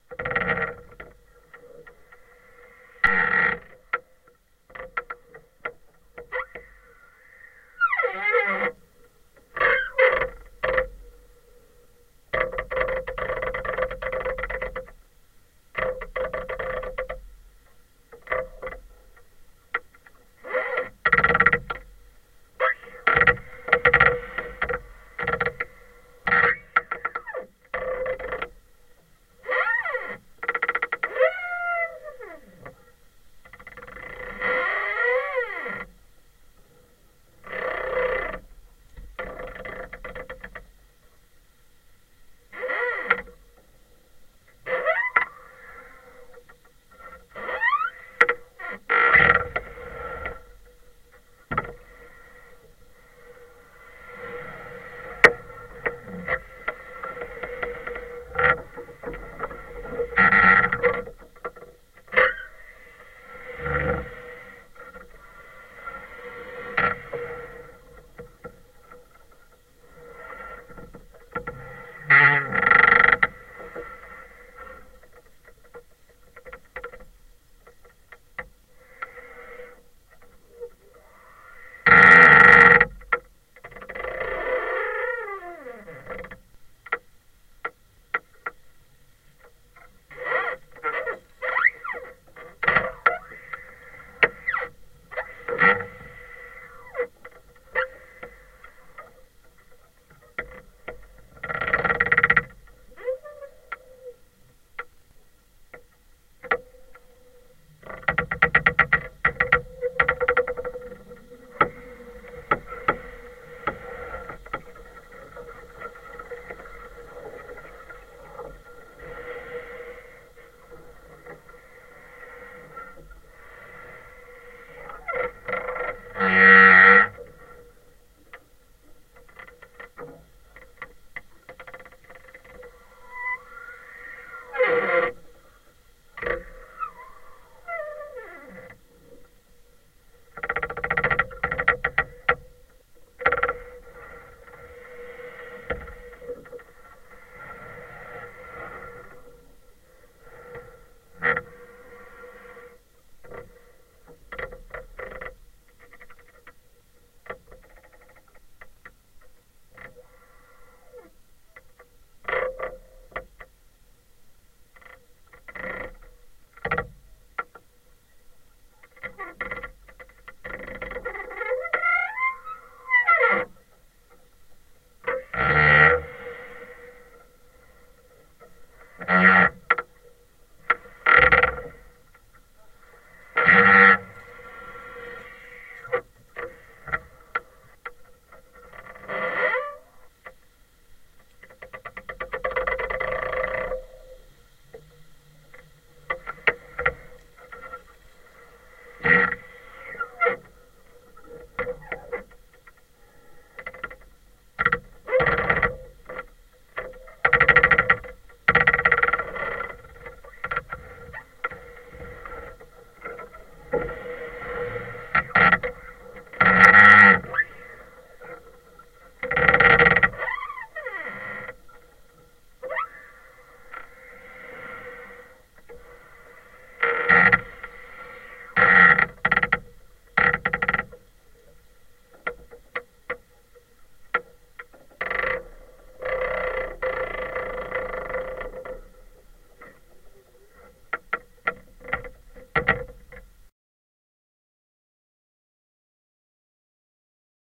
Bob Ulrich trees creaking
A very clean recording of tree branches rubbing together. Because they were recorded using a contact mic, there is no wind noise mucking things up.
Posted by permission of the recordist, Bob Ulrich.
creaking, wood, creak, trees, spooky, rubbing